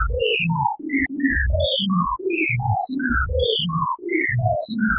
Sound made in CoagulaLight16
spectrogram, synth, coagula, space